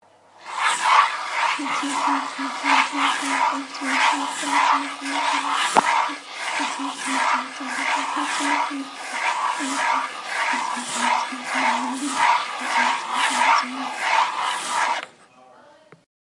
MySounds GWAEtoy Recording of voices and scraping
field, TCR